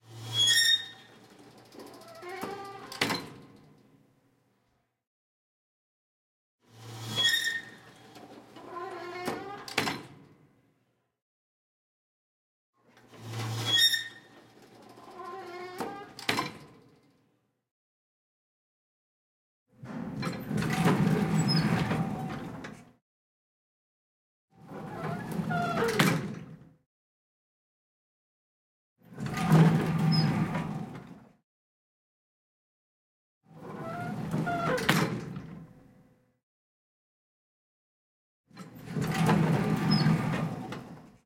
Elevator Doors 3
Old elevators doesn't just make metallic creaks or squeaks for annoyance, but to proudly reveal how long it still kept going. Annoying as it sounds (pun intended), they still work in service!
(Recorded using a Zoom H1 recorder, mixed in Cakewalk by Bandlab)
Door, Elevator, Metal, Close, Clang, Mechanical, Open, Creak, Squeak